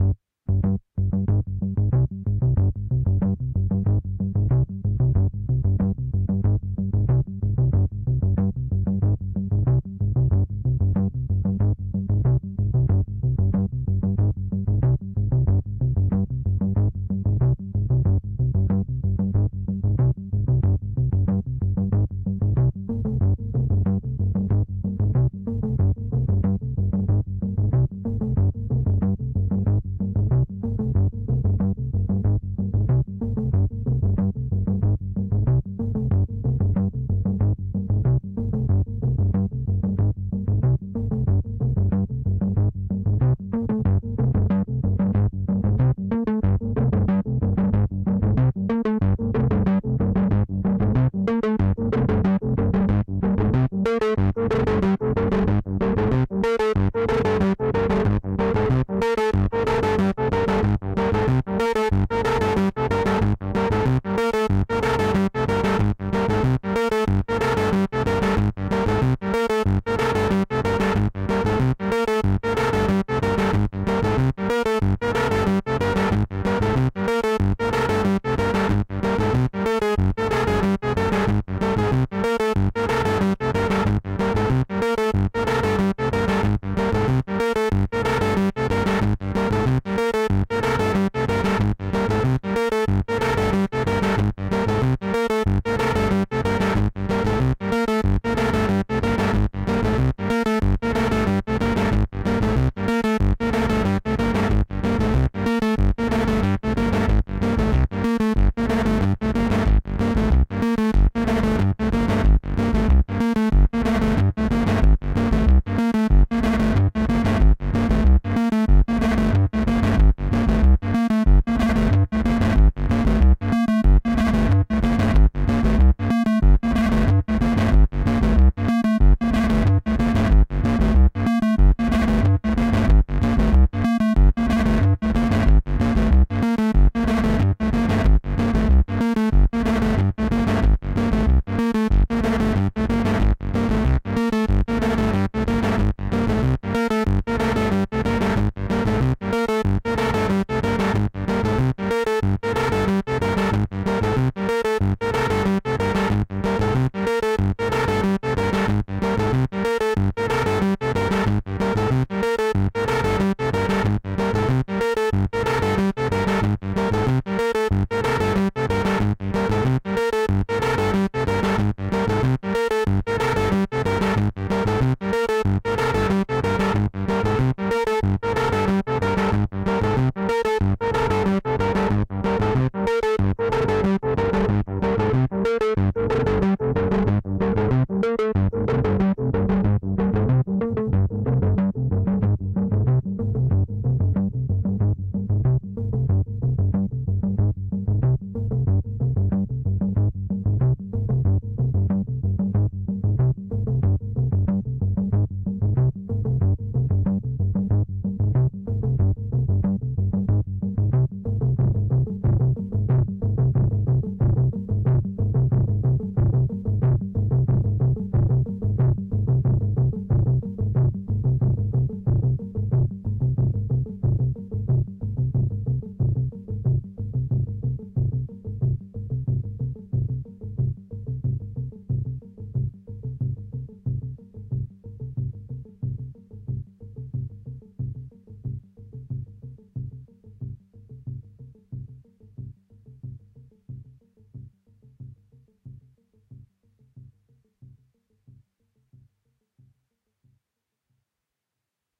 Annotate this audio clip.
Distorted analog trip